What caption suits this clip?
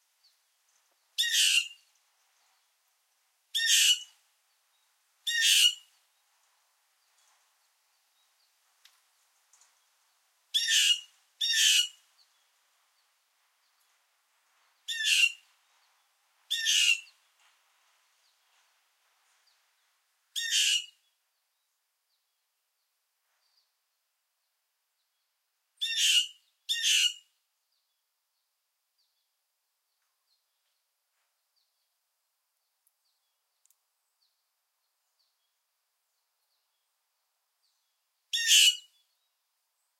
Blue Jay 2 - Grand Pre - Wolfville NS
Blue-Jay, nature